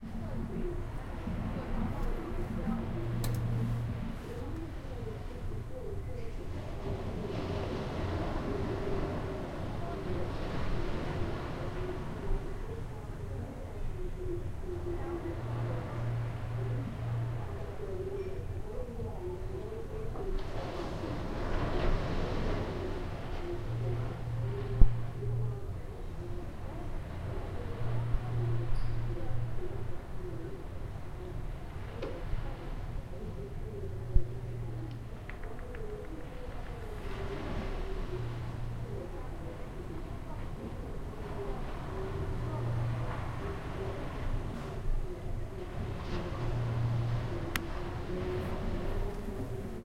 Hotel do Mar,Sesimbra, Portugal 19-Aug-2012 22:49, recorded with a Zoom H1, internal mic with standard windscreen.
Ambiance recording.
My room had a balcony next to the hotel bar.
Sound of the sea waves and the TV from my room. The live music from the bar has stopped. There are some bried sounds of glasses clinking.
There is an unidentified sound 'Booom-ooom-oooom-oooom'which I am assuming came frm ships at sea, although I could not tell which ship was making the sound. First instance of the sound is at 3s, better example at 16s. Sound repeats again at 24s, 28s and then fainter at 34s, 38s, 43s and 46s.
Sorry, there is also some handling noise in this recording.